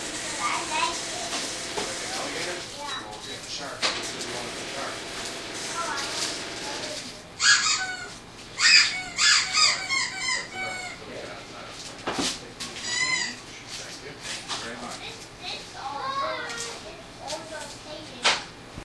Inside the gift shop recorded at Busch Wildlife Sanctuary with Olympus DS-40.
people, nature, field-recording, store